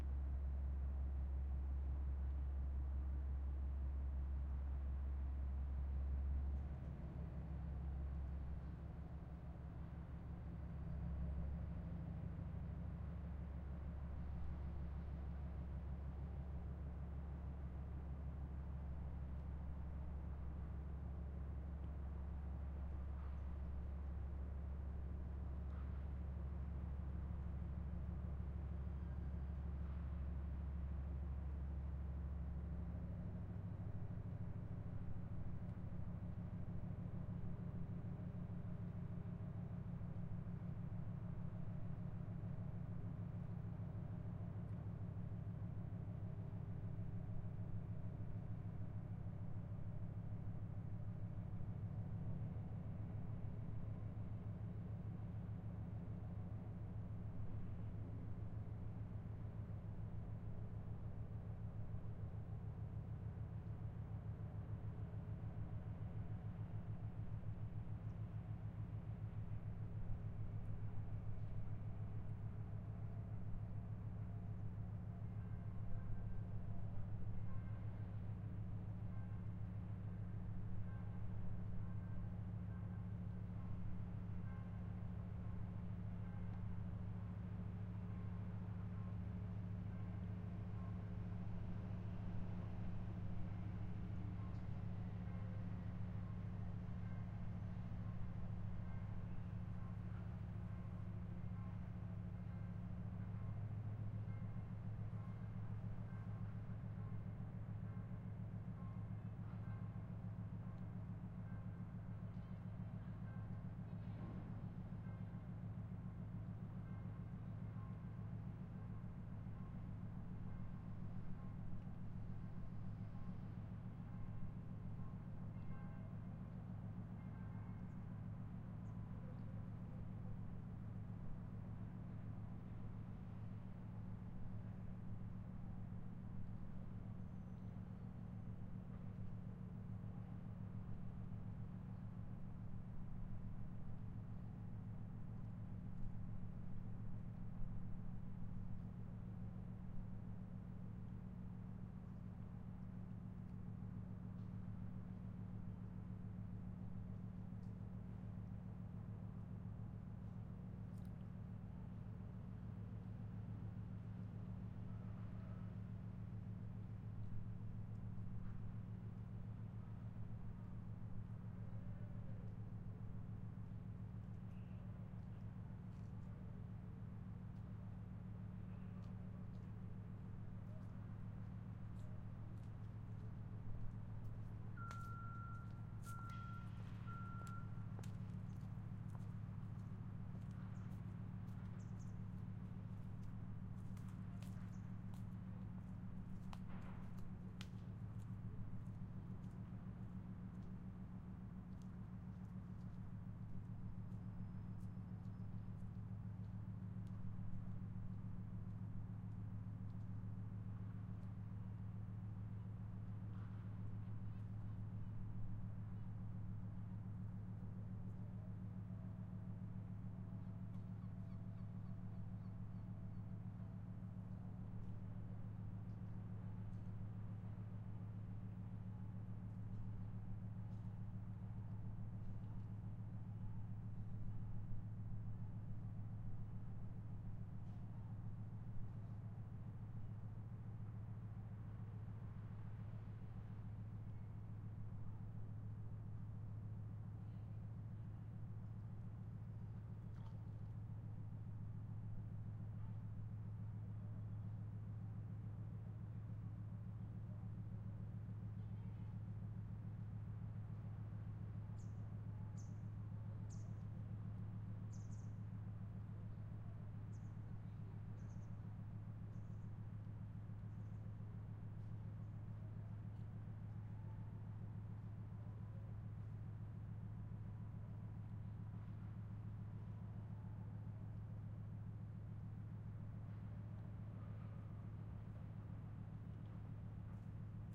081019 02 machine engine
very deep big engine from boat
engine, machine